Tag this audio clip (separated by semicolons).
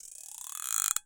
movement; distant; soft; close; lcd; crystal; stereo; spinning; cinematic; up; erratic; loud